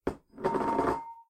Dinner Plate Impact with Vibration
A plate or bowl is placed on a hard surface (kitchen counter) and rocks back and forth briefly before coming to a rest.
bowl, ceramic, clang, clank, clatter, clean, crockery, foley, impact, kitchen, plate